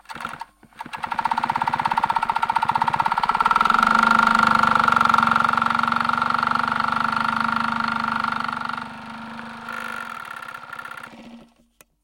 Queneau machine à coudre 25
son de machine à coudre